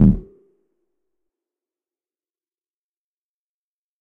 Tonic Electronic Tom
This is an electronic tom sample. It was created using the electronic VST instrument Micro Tonic from Sonic Charge. Ideal for constructing electronic drumloops...
electronic, drum